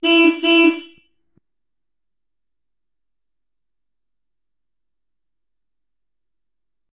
car horn-2x-mutetd
Remix of another sample of a Honda Civic car horn, beeping twice in short succession. Background noise removed. This is a clean, all dynamics present version.
civic, honda